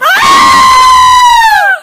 girls screaming
Scream of a girl recorded with an Iphone 5 for the University Pompeu Fabra.